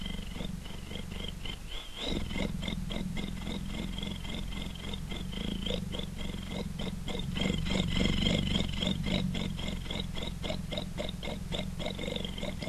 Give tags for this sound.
engine motor rumble

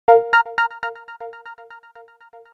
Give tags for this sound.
application bleep blip bootup click clicks desktop effect event game intro intros sfx sound startup

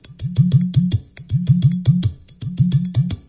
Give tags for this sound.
bass ethno percussion rhythm samba udu vase

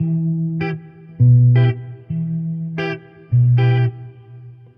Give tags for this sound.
guitar electric

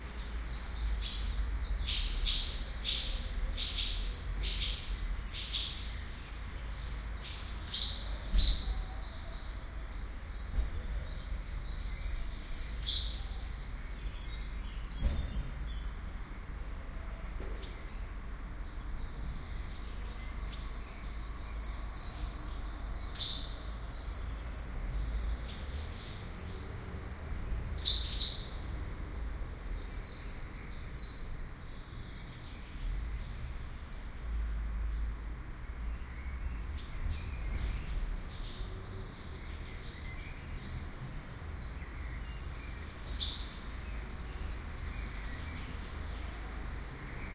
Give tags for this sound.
binaural dummyhead field-recording kunstkopf swallows tram

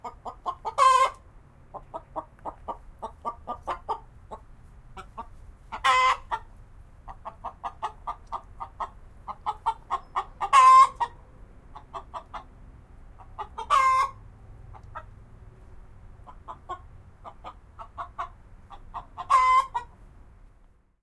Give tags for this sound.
chicken field-recording environmental-sounds-research egg laying

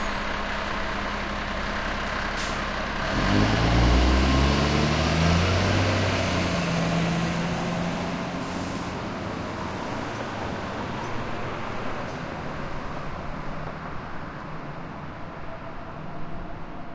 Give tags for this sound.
away diesel idle long mack pull rev semi slow trailer transport truck

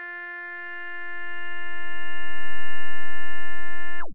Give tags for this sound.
multisample square subtractive synth triangle

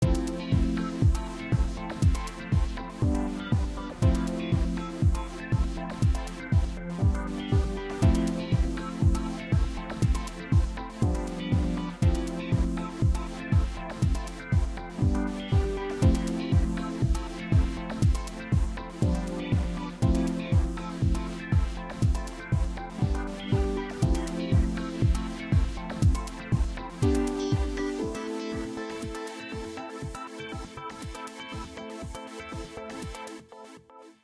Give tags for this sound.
Ambiance atmosphere electro music

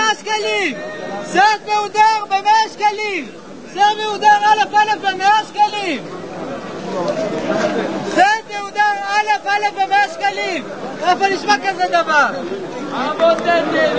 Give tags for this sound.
field-recording israel jerusalem market succos sukkot vendor

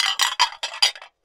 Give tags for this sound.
break ceramic china cup Dish percussion porcelain smash

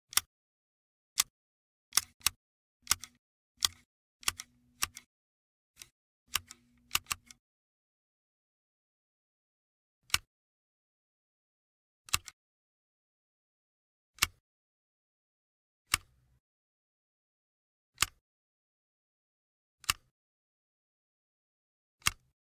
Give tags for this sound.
hair barber scissors haircut cut

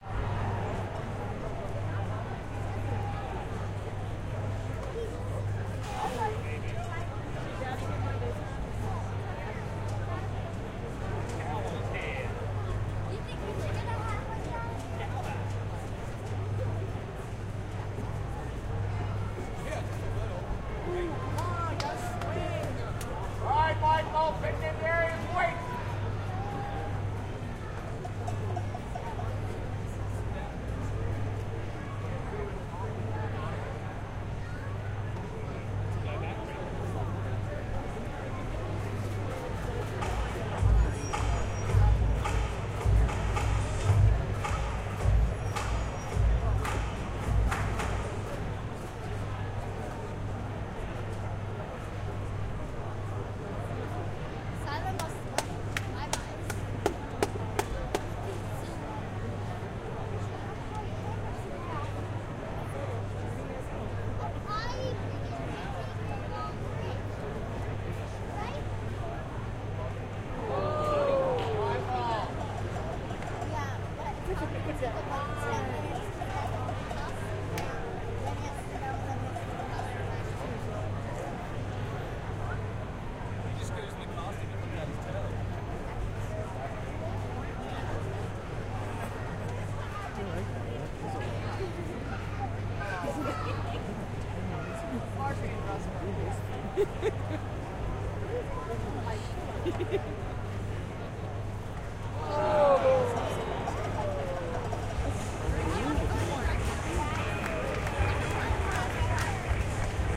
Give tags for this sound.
ambience ambient baseball crowd field-recording league minor

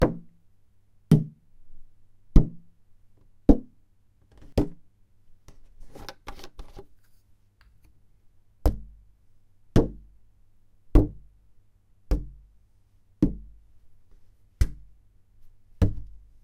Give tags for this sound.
6-pint dare-9 drum hit milk-bottle percussion plastic-bottle

